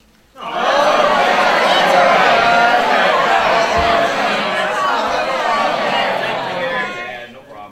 Crowd Affirmation
Recorded with Sony HXR-MC50U Camcorder with an audience of about 40.
audience, crowd, affirmation, agreeing, mob